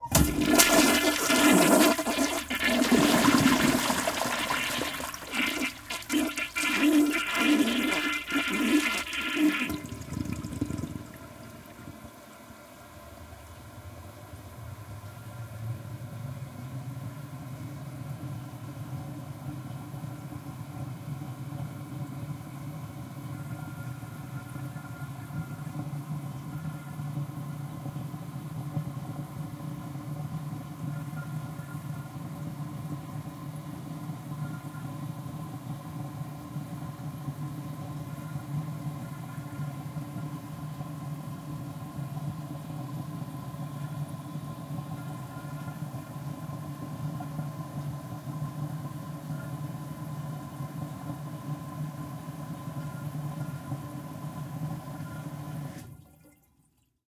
This is a friend's toilet, recorded in March 2008 from Nashville, Tennessee, United States, using a Zoom h4 and a set of Cad M179 studio condensers.